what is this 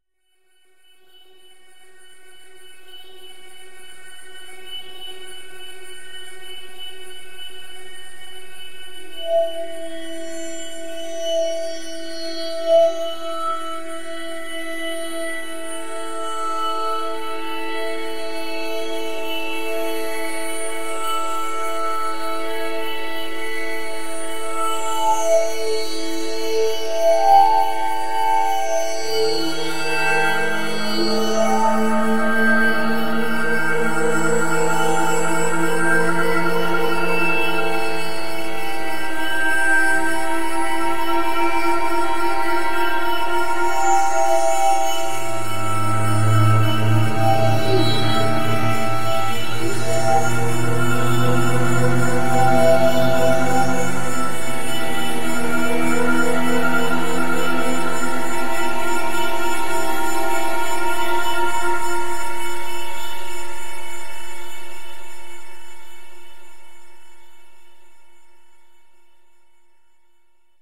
far 2 imeasurable
Follow the sound and you come at the beginning of the universe.
Made with Grain Science app, edited with WavePad.